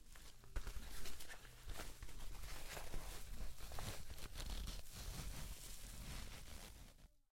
Sonido de tela contra tela
Sound of rubbing clothes